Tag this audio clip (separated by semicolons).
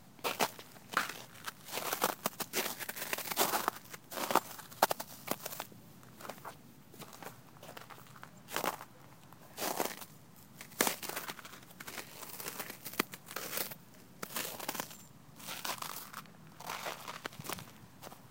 crunch; walking; field-recording; snow; footsteps; river